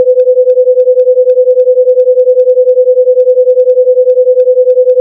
A sound stimulus to demonstrate binaural beat from headphones.
You should hear an additional frequency of 500 HZ. The sound itself is summation of
500 on the left Hz and 510 Hz. You should hear additional beats only if you use headphones.
beat, binauralbeat, headphones